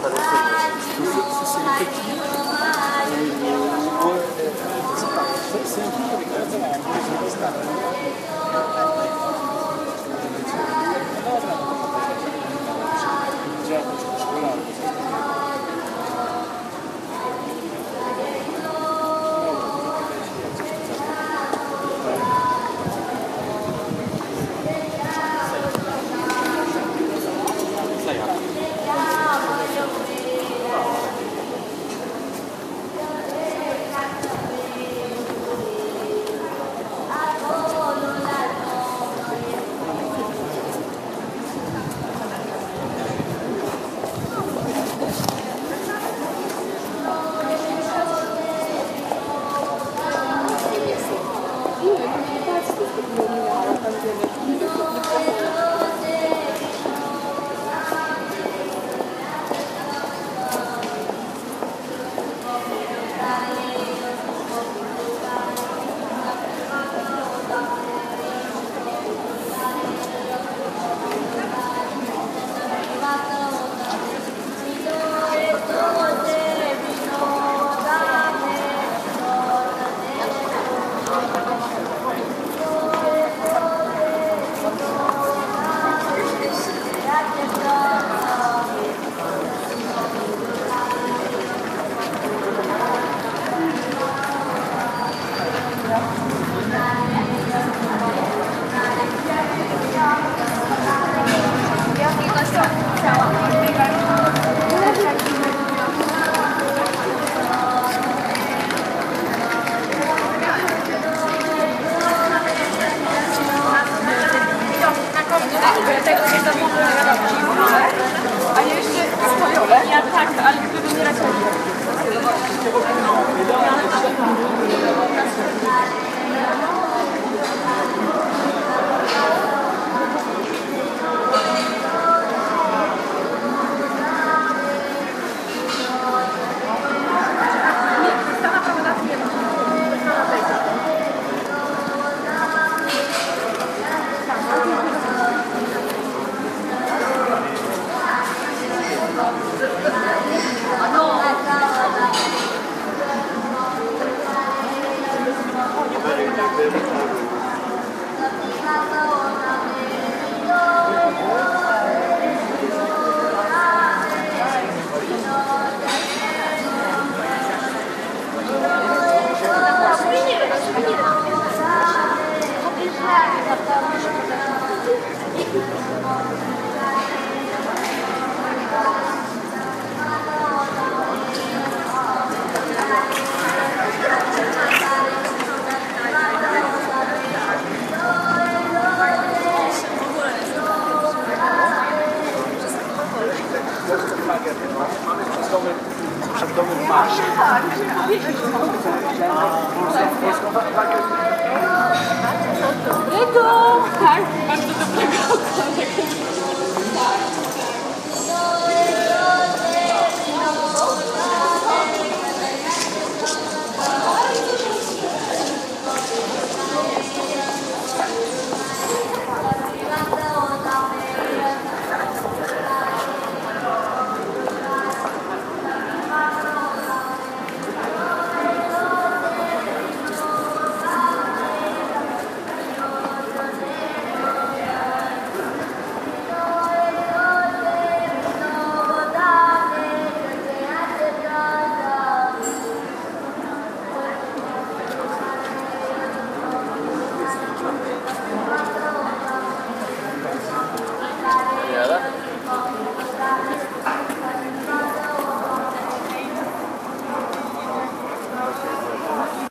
Old Town Singing with Street Sounds
06/23/16, an old woman sings in an alley in old-town, warsaw, poland
city, europe, poland, singing, street, warsaw